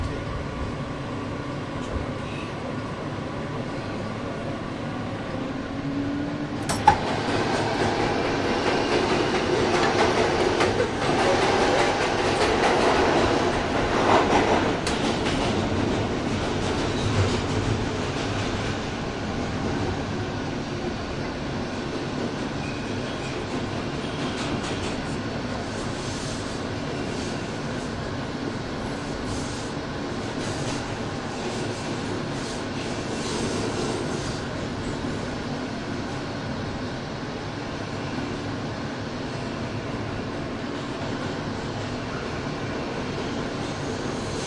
Subway interior, emergency exit doors open and close inbetween stops
Ticket machine in NYC subway, emergency exit between the cars in-between stopss